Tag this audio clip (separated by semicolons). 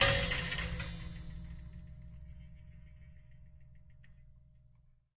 collide; collision; percussive; spring; wood